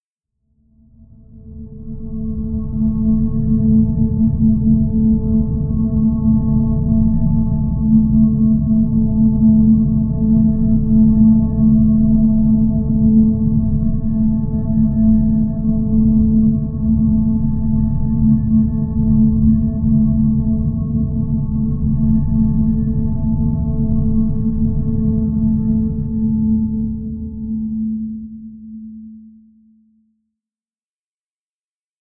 Pad 006 - Whispering Ears - A4
This sample is part of the “Pad 006 – Whispering Ears” sample pack. Really soft tones. The pack consists of a set of samples which form a multisample to load into your favorite sampler. The key of the sample is in the name of the sample. These Pad multisamples are long samples that can be used without using any looping. They are in fact playable melodic drones. They were created using several audio processing techniques on diverse synth sounds: pitch shifting & bending, delays, reverbs and especially convolution.
ambient, atmosphere, drone, multisample